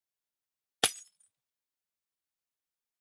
Breaking Glass 11
breaking, shards